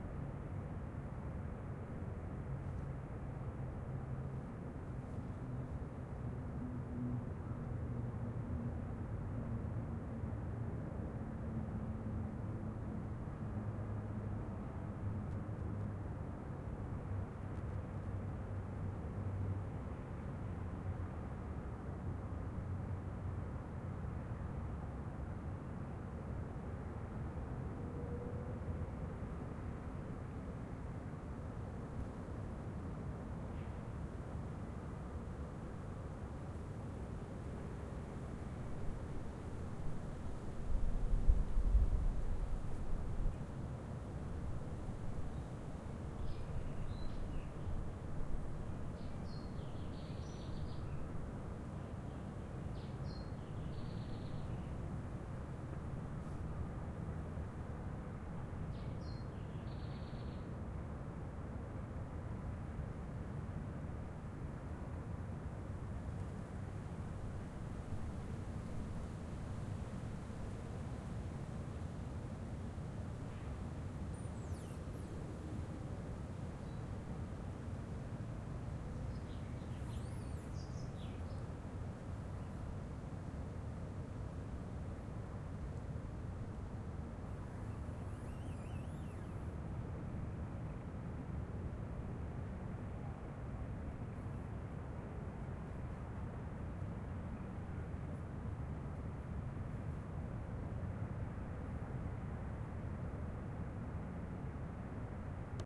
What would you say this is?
Quebrada La Vieja - Murmullo urbano desde los cerros orientado hacia la ciudad
Grabación en la Quebrada La vieja. Bogotá-Colombia
Cantos de aves y murmullo urbano desde los cerros en el interior del bosque con vista a la ciudad 07:04 a.m.
Field recording from river La Vieja. Bogotá - Colombia
Birdsong and City whispering from the hills in the forest overlooking of the city 07:04 a.m